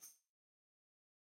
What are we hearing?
tambourine hit 03
10 inch goatskin tambourine with single row of nickel-silver jingles recorded using a combination of direct and overhead mics. No processing has been done to the samples beyond mixing the mic sources.
dry, multi, real, skin